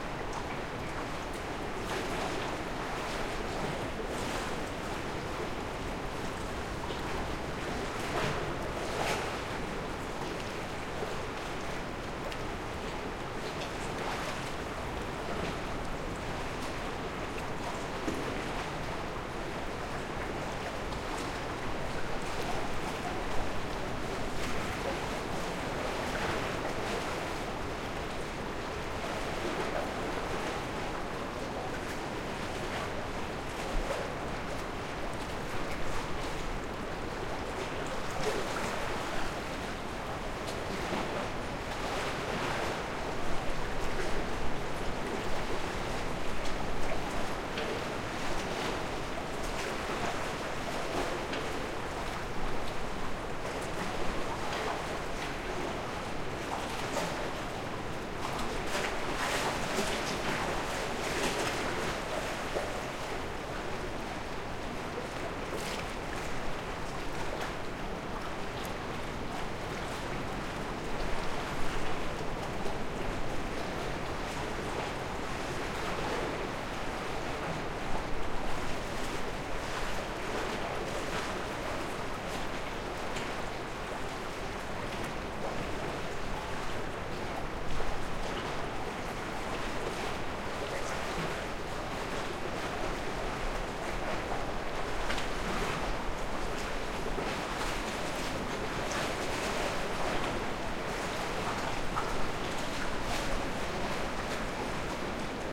on the dam of the Möhne Reservoir
Recording of the waves from one of the two houses on the dam of the Moehne reservoir. I had to shelter there, as the wind was too strong otherwise to do a decent recording. AT835ST microphone and Oade FR-2le recorder.